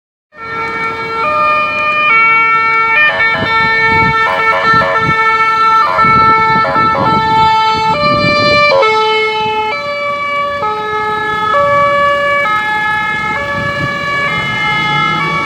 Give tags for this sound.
ambulance; siren; danger; emergency

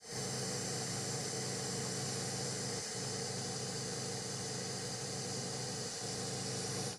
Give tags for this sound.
television; static; TV

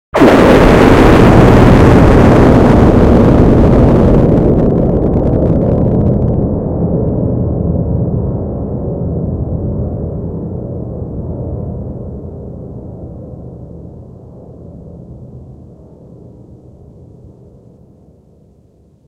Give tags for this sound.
tornado; atomic; emergency; nuclear; explosion; horn; hornblast; alert; bomb; siren; blast; storm; alarm

